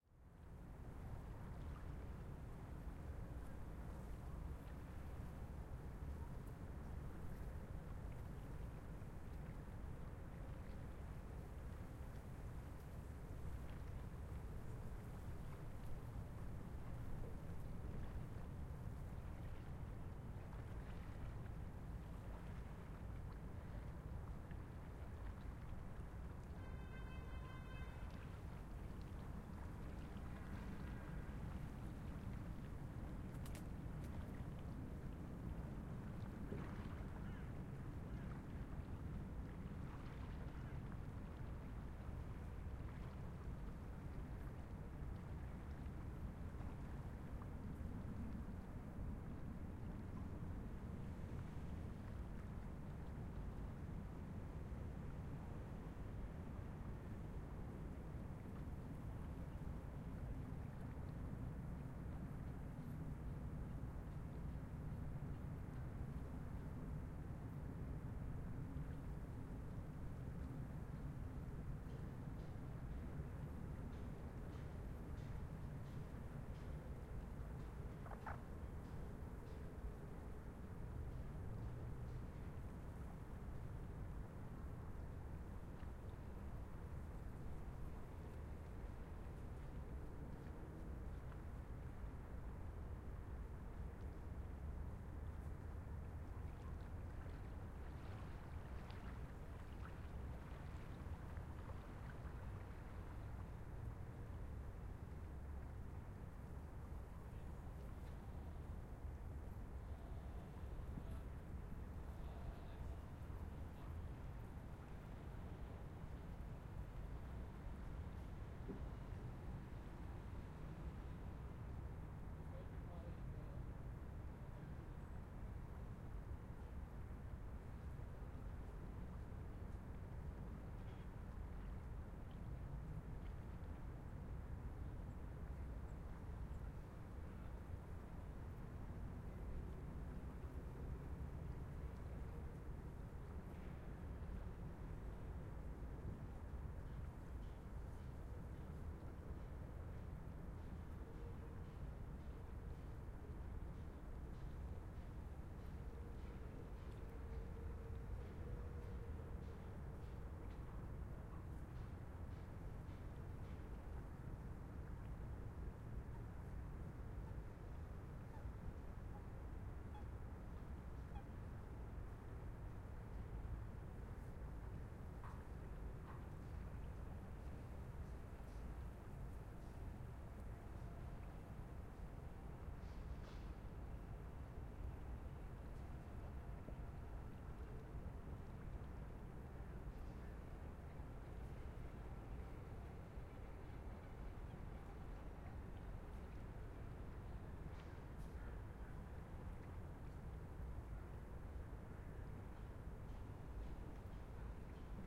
atmo wind leaves water traffic
Atmo of some reed in the wind, with far traffic sound and a construction site far away. Recorded at the Alster in Hamburg, Germany. Recorded on a Zoom H5 with x/y-head.
construction, site, waves, wind